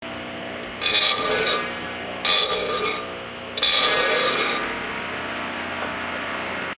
Our school is building a swimming and sports complex. Here are our recordings from the building site.
BuildingSite, Switzerland, TCR